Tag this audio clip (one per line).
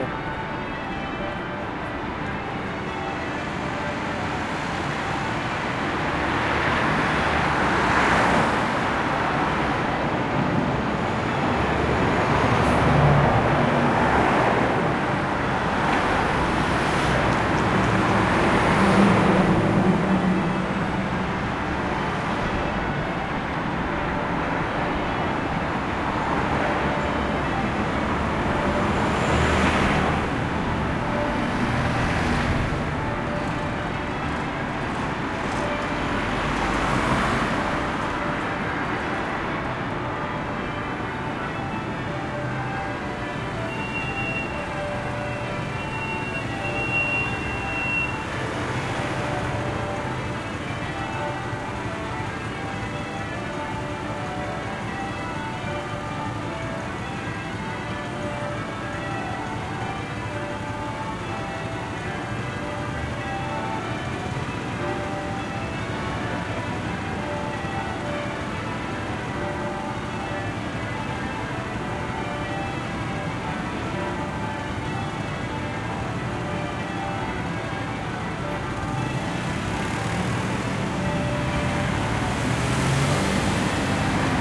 bells
church